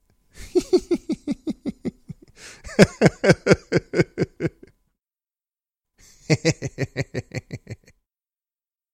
AS076768 Amusement
voice of user AS076768
amusement, diversion, entertainment, human, male, man, recreation, vocal, voice, wordless